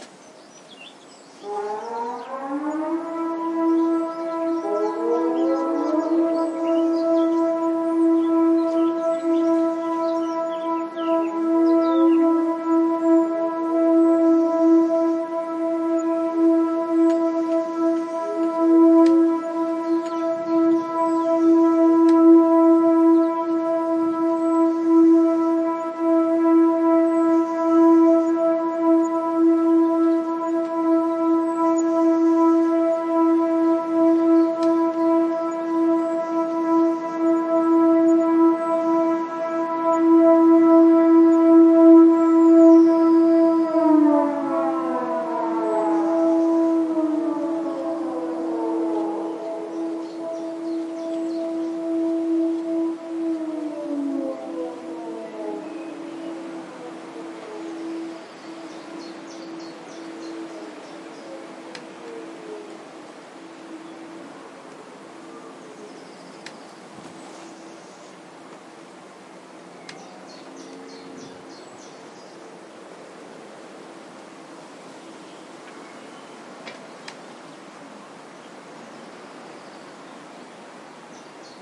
Warning Siren 2 Filtered (06 May 15)
Every year, at midday on the 1st Wednesday in May, 1,078 air raid sirens across Denmark are tested. There are 3 signals, but in 2015 I only managed to record the last 2:
12:00: "Go inside"
12:04: "Go inside"
12:08: "Danger is passed"